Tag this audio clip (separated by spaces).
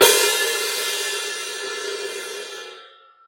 Sample Hihat Record